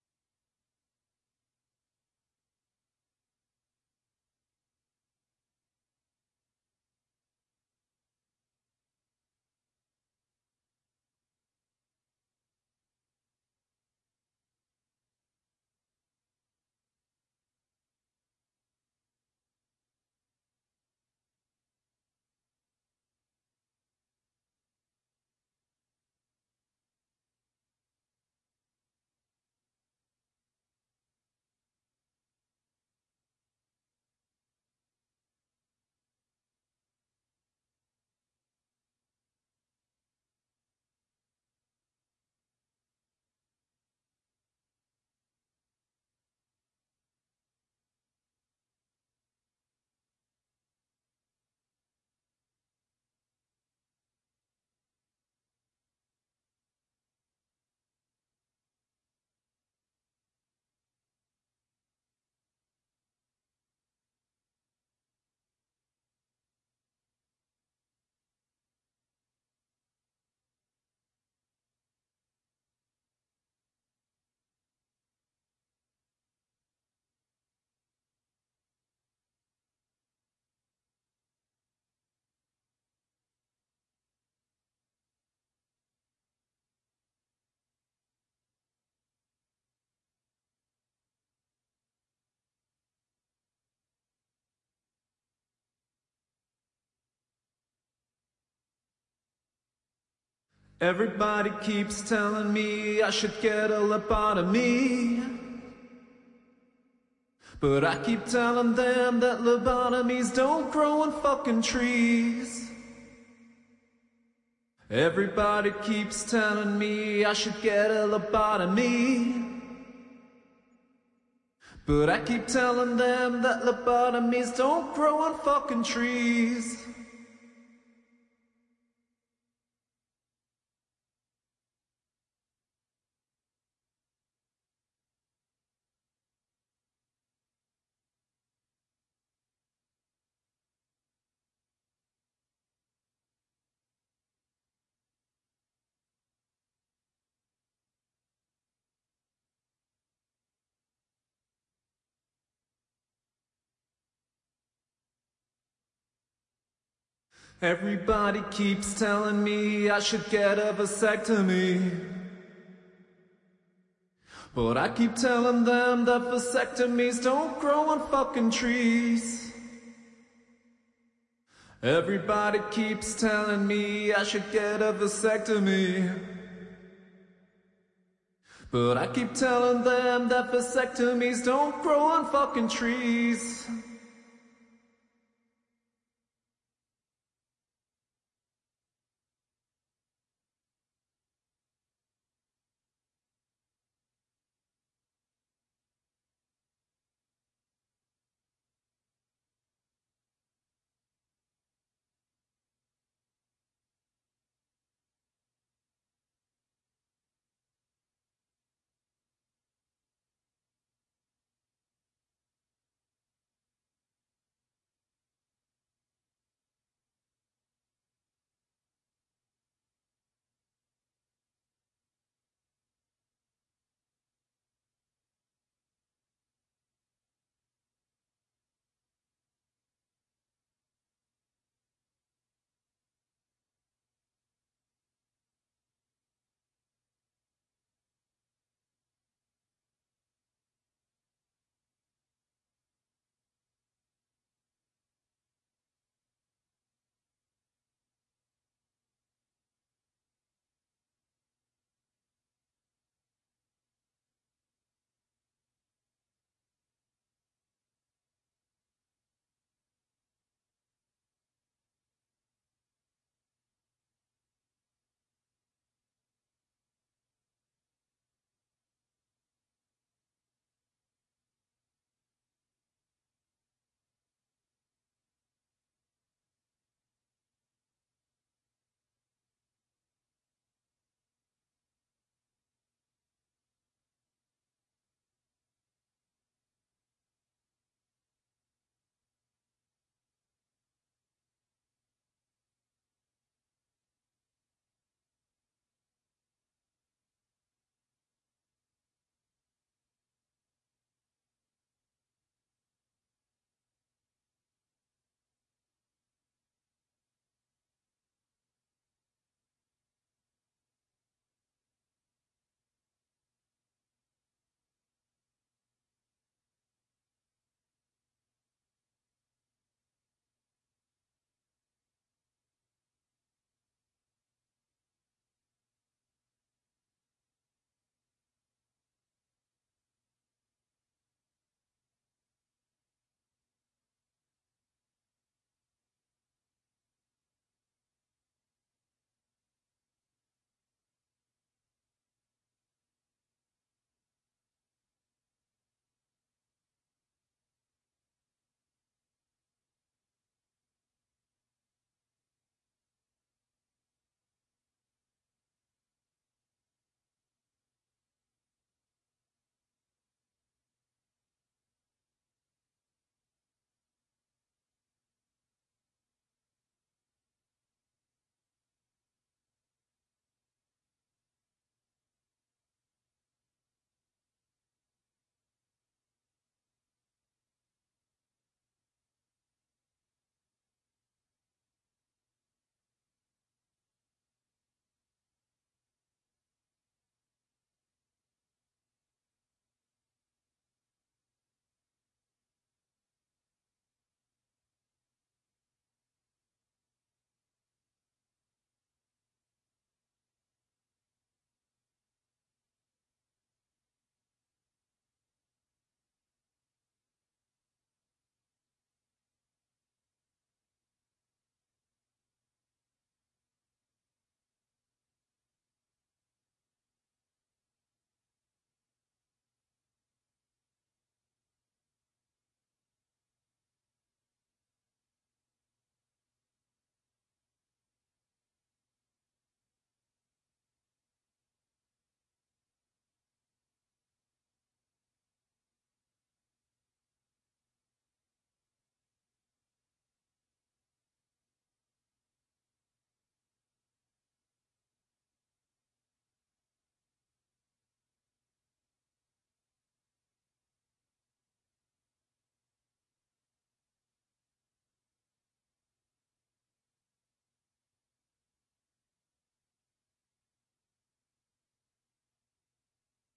Content warning
male, stem, sing, voice, vocal